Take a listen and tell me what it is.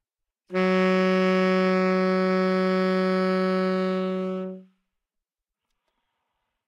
Sax Tenor - F#3
Part of the Good-sounds dataset of monophonic instrumental sounds.
instrument::sax_tenor
note::F#
octave::3
midi note::42
good-sounds-id::4975
Fsharp3, sax, multisample, neumann-U87, single-note, good-sounds, tenor